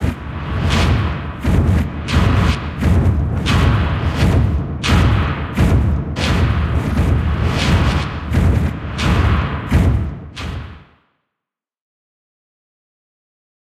Flesh Factory Nightmare
Industrial sounding rhythmic thumping sfx.
thumping, mechanical, industrial, rythmic